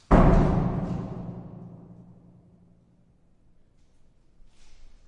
Queneau porte reson 01
fermeture d'uen forme dans un hall reverbérant